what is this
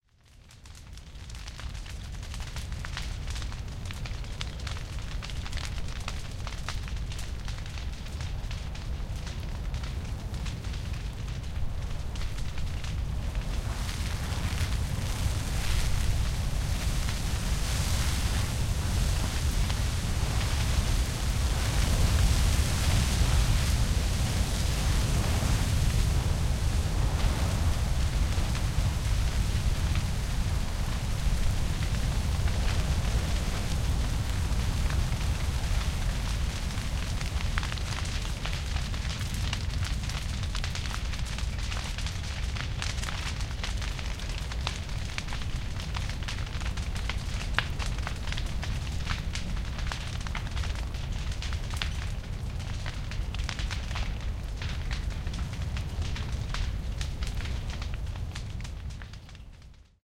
maple fire
This was recorded about 15 feet away from a stand of small, burning lodgepole pine trees. At about 14 seconds, you'll hear a small clump of trees burst into flames, or "torch."
wild-fire, burning, crackling, maple, field-recording, National-Park-Service, crackle, fire, yellowstone